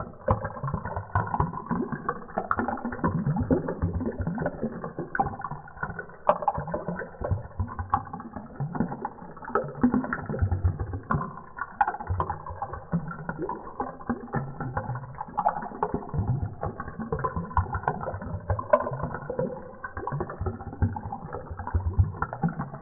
ELEMENTS WATER 01 Underwater
This is a mix between synthesized sound,
Sound created for the Earth+Wind+Fire+Water contest
recordings of some water bubbles
and some varying feedback noises
The repetitive sound is basically
a whitenoise waveform with a bandpass filter
Then phased for add that liquid deepness feeling.
Small amount of delay and a touch of reverb.